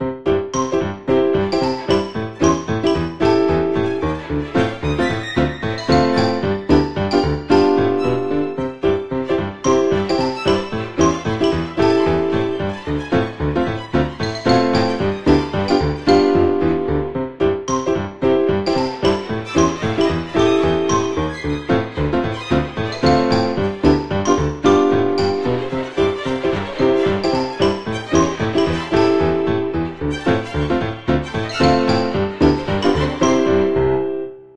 Rogue Strings Rag
Dominic is weird. He can usually keep it together. Not today.
Although I'm always interested in hearing new projects using this loop!
cartoon, chaos, fragment, insane, insanity, motif, music, piano, rag, ragtime, rogue, strings, weird, xylophone